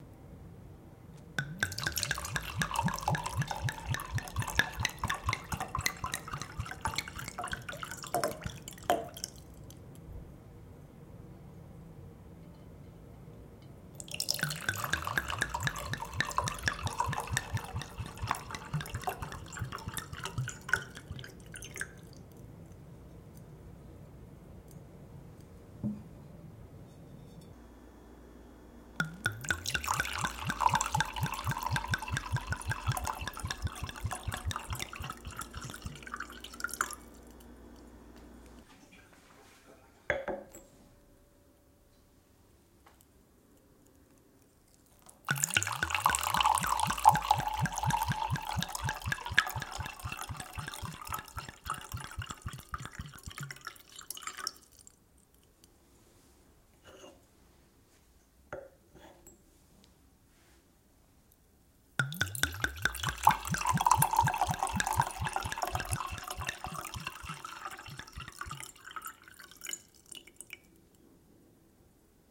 wine pouring 77mel 190213
Pouring liquid from wine bottle into glass.
liquid, wine, pouring, glass